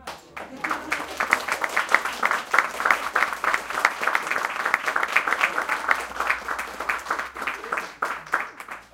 Applause during Laetitia Sonami Interview in Peek & Poke Museum Rijeka.